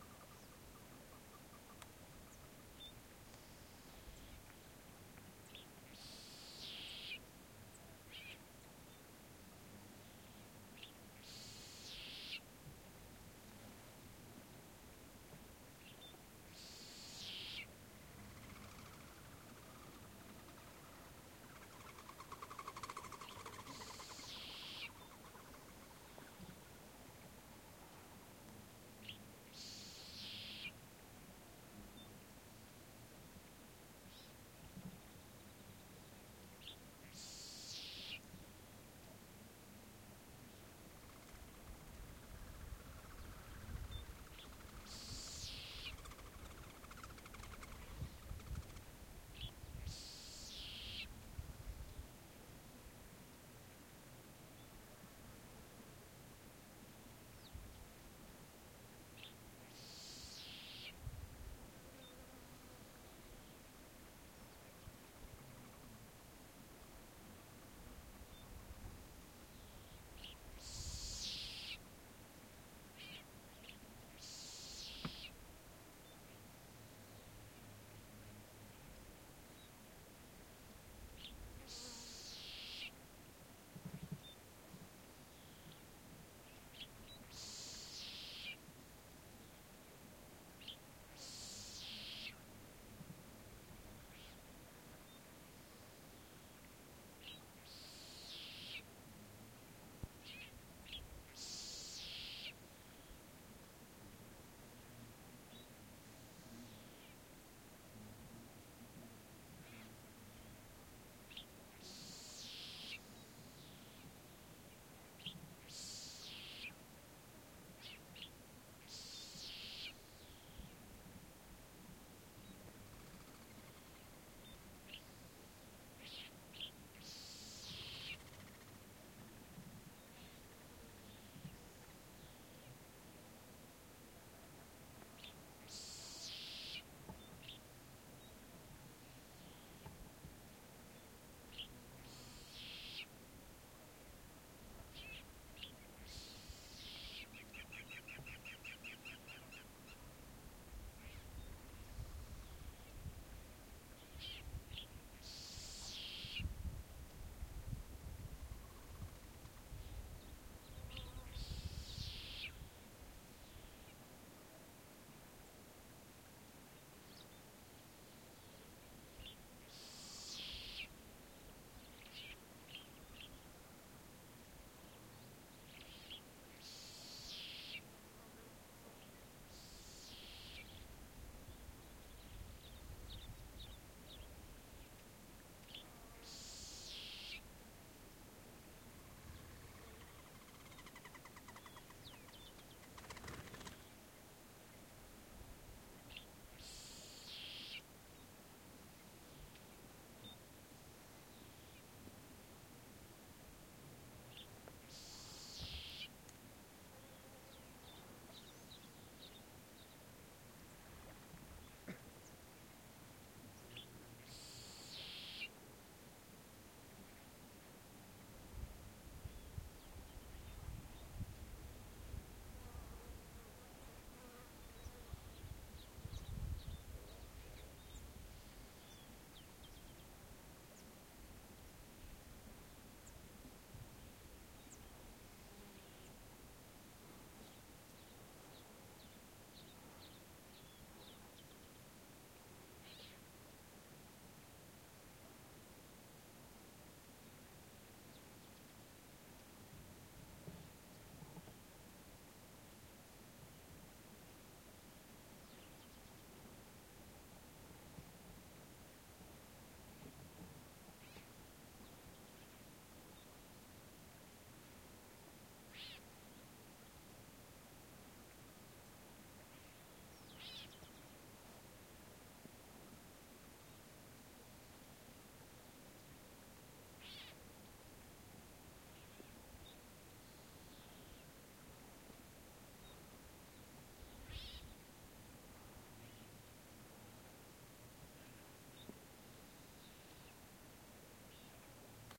AMB frente al pantanal en altiplano
Ambience in a marsh in the chilean highlands near the bolivian border.
Rec: Tascam 70D
Mics: Two Rode M5 in ORTF configuration
ambiance, birds, insects, water, nature, field-recording, ortf, altiplano